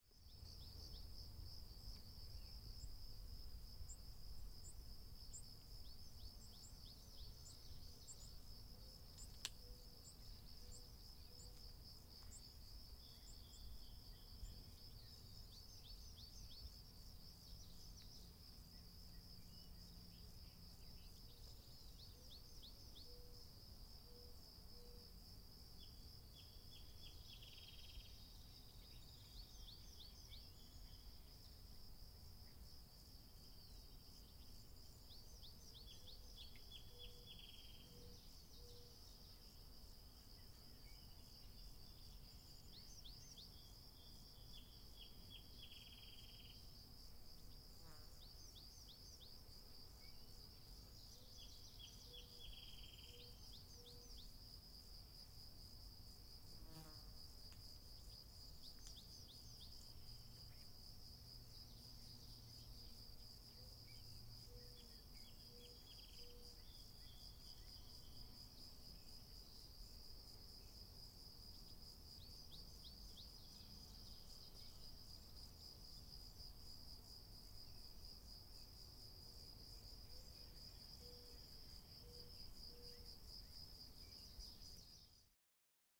Frogs and birds near a small marshy area. Recorded during the day with a Rode NT3 and ZOOM H6.